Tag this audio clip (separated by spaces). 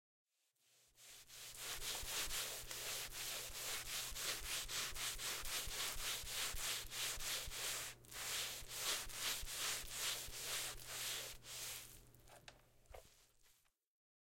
cleaning house housework